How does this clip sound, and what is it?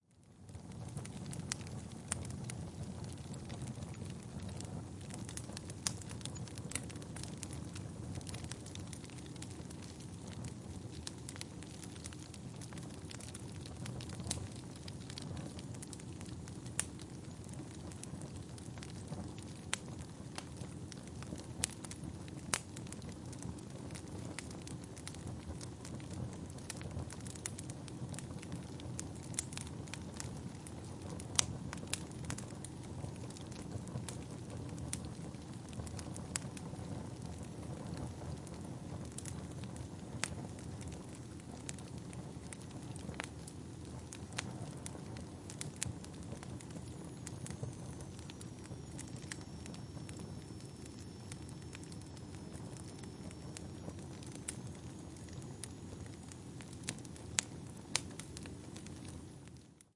fire crackling
This was recorded a few feet away from an indoor fireplace. The recording was made with a Tascam DR-05.
Note: you may hear squeaking sounds or other artifacts in the compressed online preview. The file you download will not have these issues.
burning, wood-fire, flame, fire, crackling